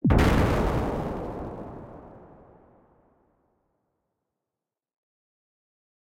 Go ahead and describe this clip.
A reverberated and processed sound of an explosion